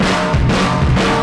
let-it-go breaks4
Drum recording from live session with Fur Blend - 2 Mic recording onto 3M M79 2" tape at Greenmount Studios
192, drum-and-bass, recording, distortion, drum-loop, drums